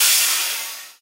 foil whap3jcg2016
A sound i call a Ewhap. good for electronic, industrial and edgy compositions.
soundeffect, digital, noise, sound-design, glitch, cymbol, crack, electronic